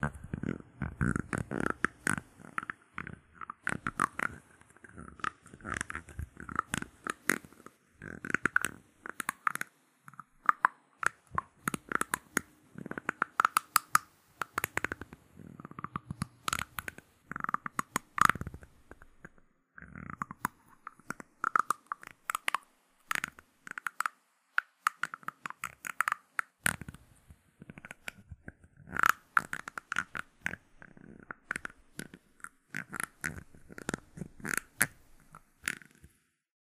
This sound was created by scraping against eachother the two hollow halfs of a plastic children surprise egg. Sound quality could be better. Recorded with the Samson C01U USB microphone and some noise reduction in Adobe Audition.